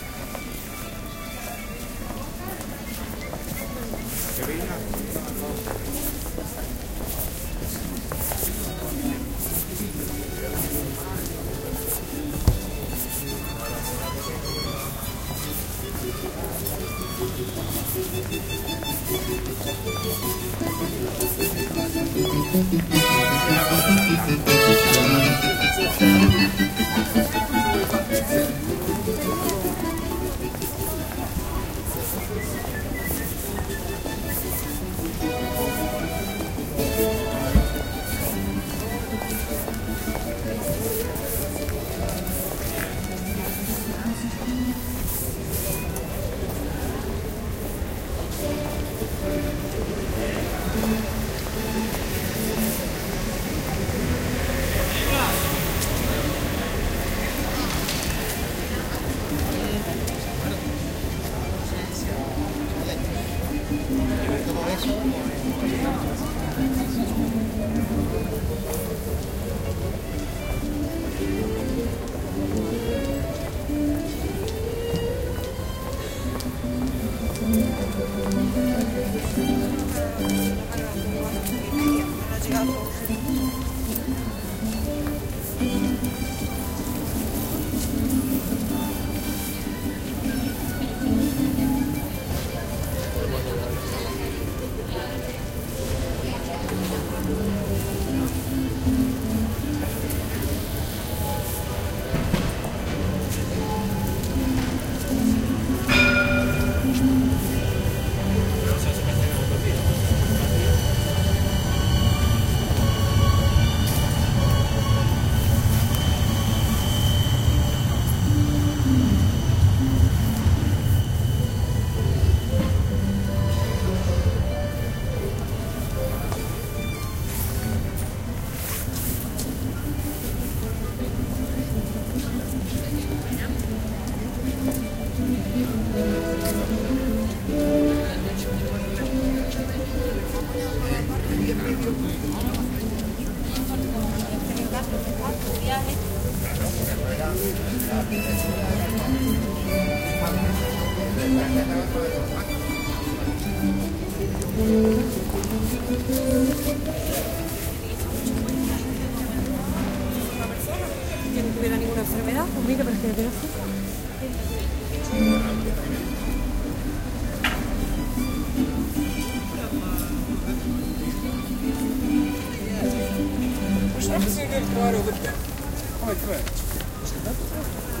20090115.street.ambiance
Street ambiance with people talking, a musician plays a well known Mozart piece, a tram passes. No applauding, no police sirens, just a fragment of city life. Edirol R09 internal mics
music, street, seville, ambiance, tram, field-recording